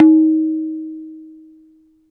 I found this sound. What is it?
Ringing stroke tun on the right tabla drum, dayan.